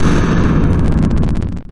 Explosions created using Adobe Audition
white, noise, explosion, big